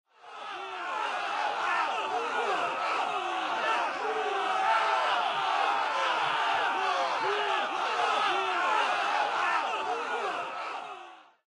Angry Crowd - Fight
crowd, fighting, Angry